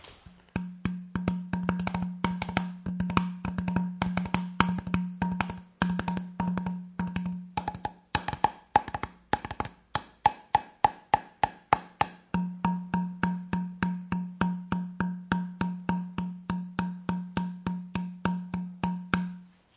CLICK RHYTHM 04
A set of rhythms created using kitchen implements. They are all unprocessed, and some are more regular than other. I made these as the raw material for a video soundtrack and thought other people might find them useful too.
beats, improvised, rhythmic